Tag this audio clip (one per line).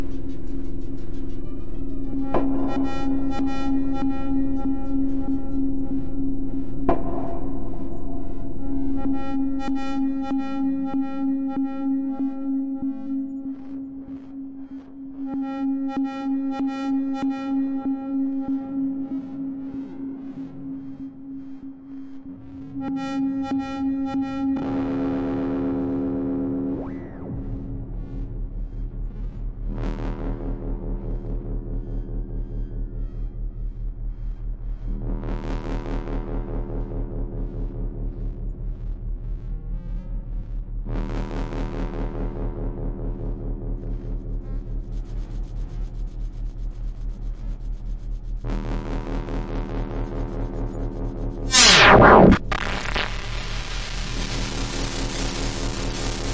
2
200t
6
Buchla
Cloudlab
Emulation
Instruments
Native
Reaktor
Runs
Software
That
V1